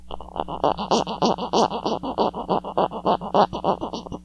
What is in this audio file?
Contact mic edge rubbed against power button texture of a Motorola Moto X.